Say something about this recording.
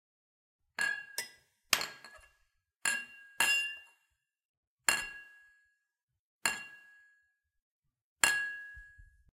Tinkling the glasses.